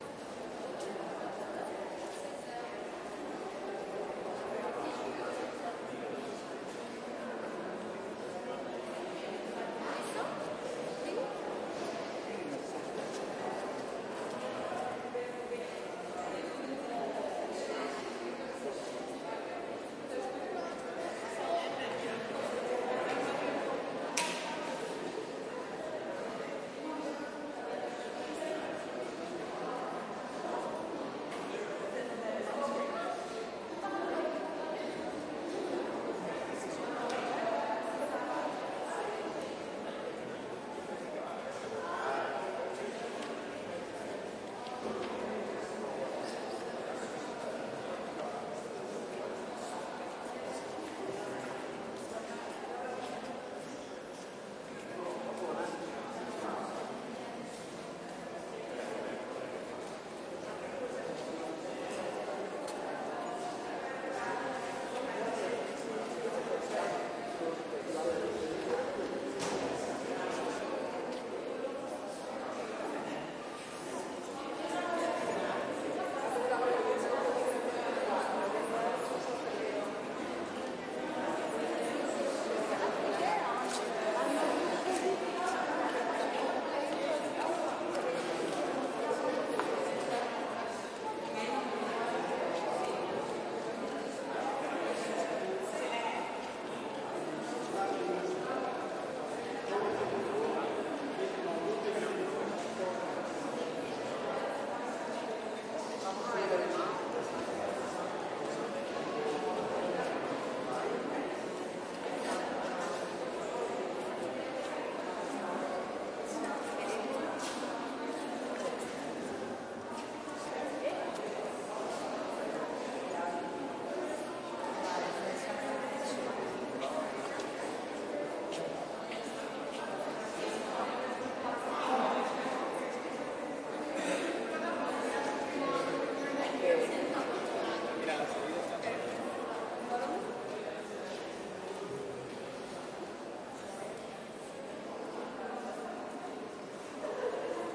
gallery ambience eq
people moving through echoey museum. recorded in Madrid. multi-european indistinguishable voices and footfalls. some mic rumble so I've added a 100Hz low pass filter to clean it up.